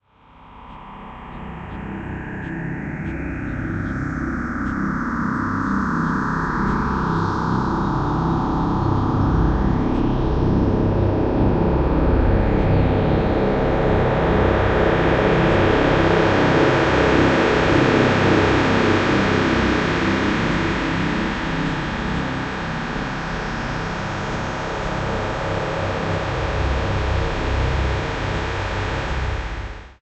Dark ambient drone created from abstract wallpaper using SonicPhoto Gold.
img2snd, atmosphere, sonification, drone, dare-22, dark, ambient